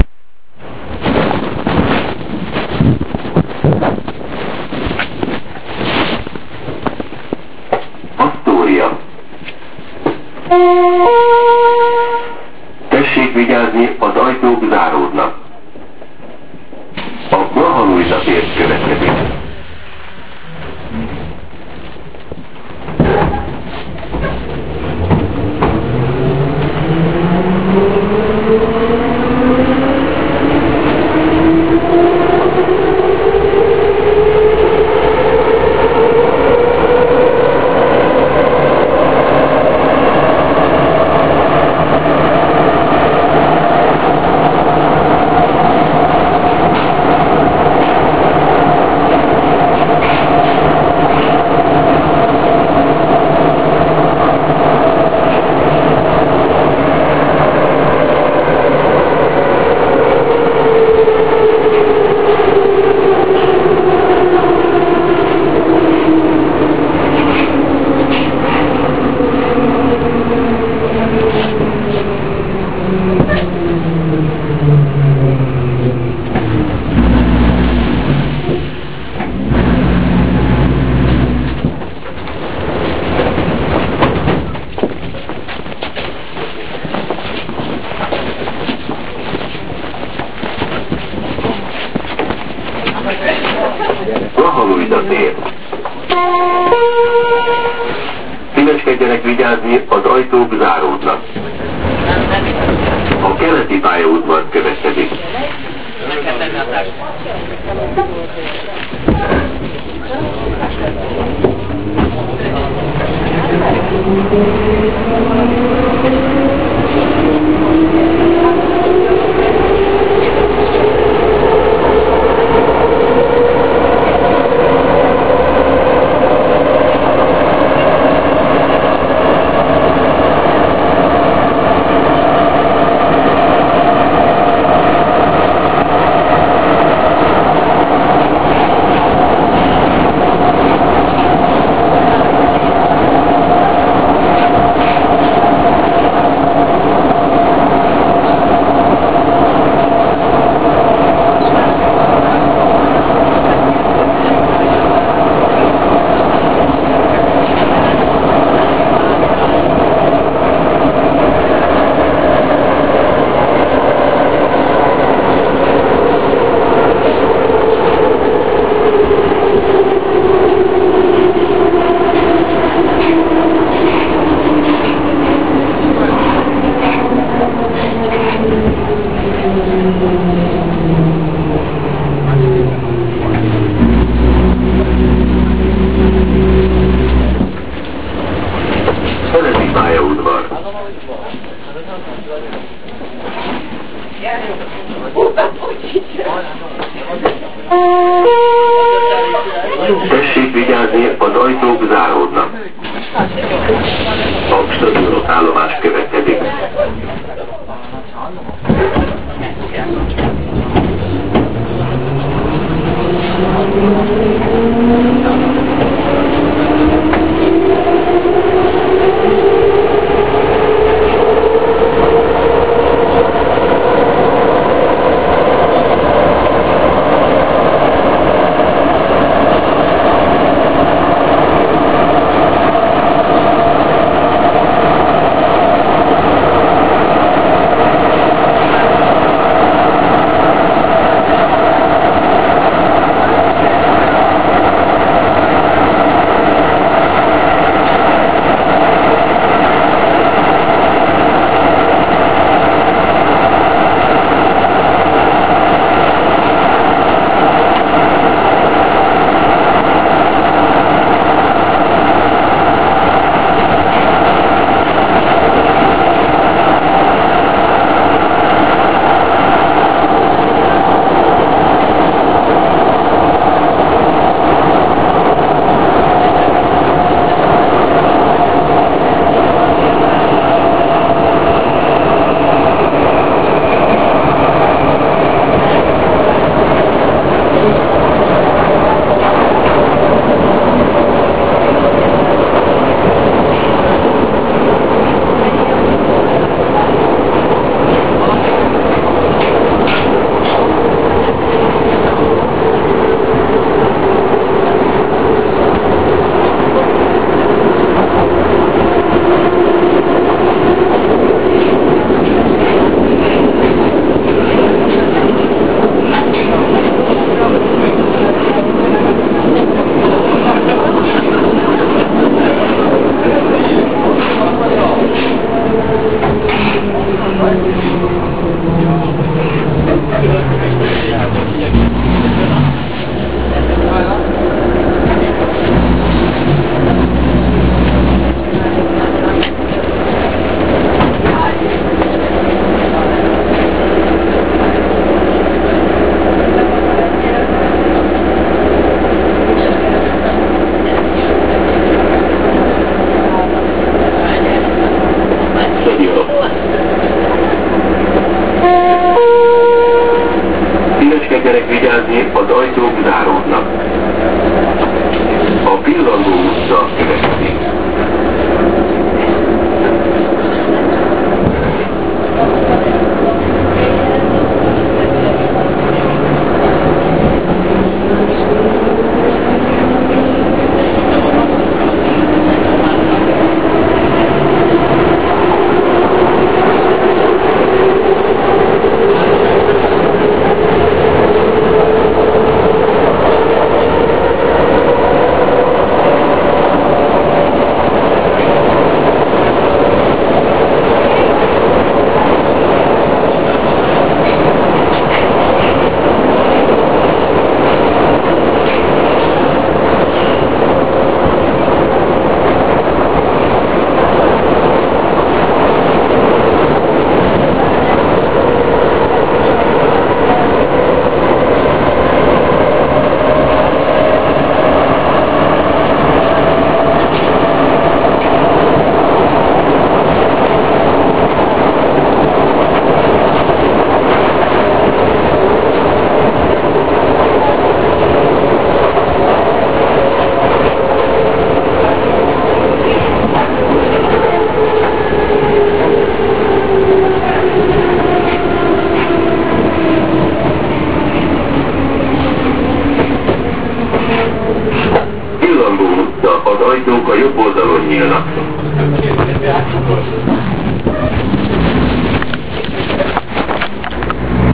I have recorded this piece with the microphone in my camera during coming home from the college on the Hungarian Underground line 2 from 'Astoria' to 'Pillangó utca' / five stations /